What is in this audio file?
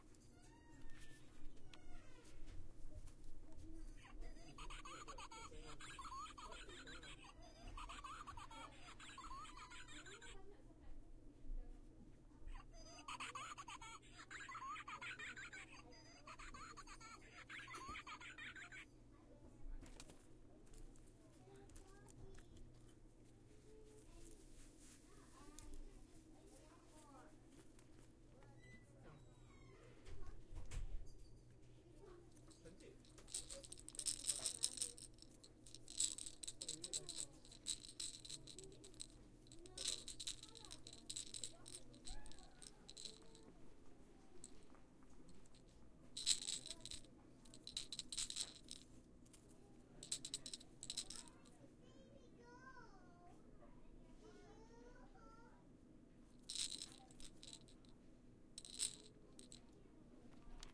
laughing sound toys panning around my recorder. then, a rattle.
one in a series of recordings taken at a toy store in palo alto.
analog-circuit, rattle, toy-store, toy, shaker, laughing, panning, baby, sound-toy